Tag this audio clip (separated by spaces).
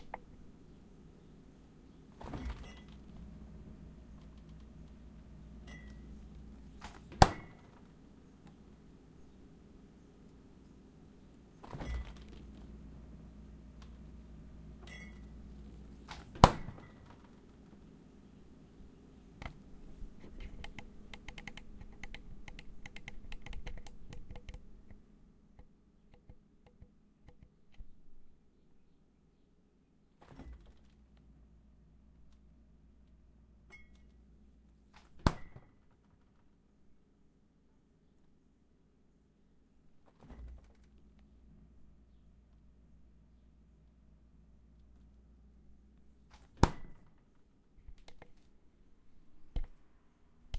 close closing door open opening refrigerator